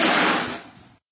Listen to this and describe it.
human sliding on ice with boots